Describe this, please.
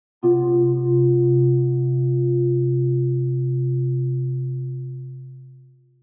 hit impact gamesound sfx game fx
fx, game, gamesound, hit, impact, sfx